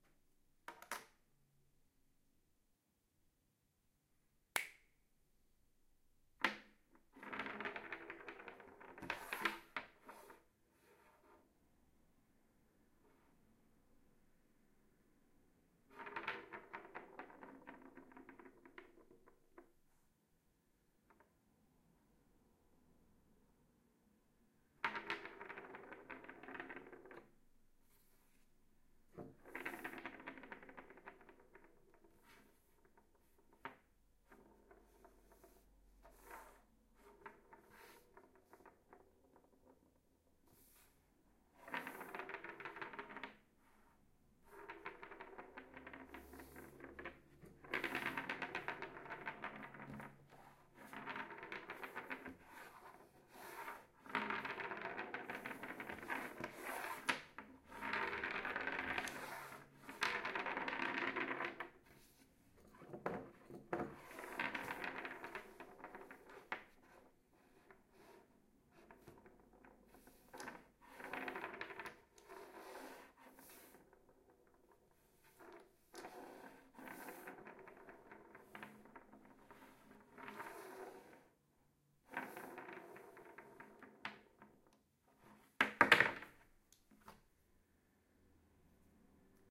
rolling batteries
raw recording of AA batteries rolling on a wooden desk
batteries, wood, rolling